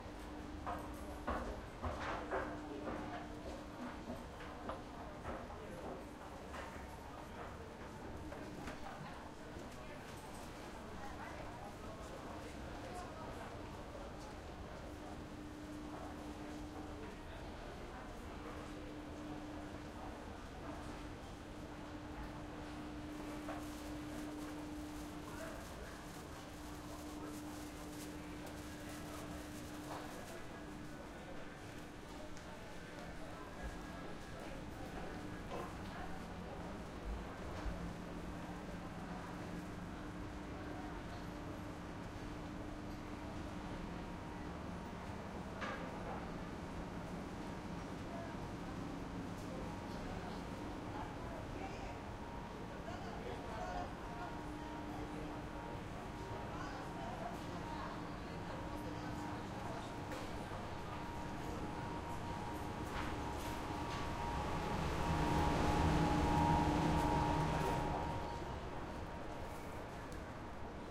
sound walking in the shopping mall first nice pair of wood shoes than some automatic downhill movement and finally ventilation on the main door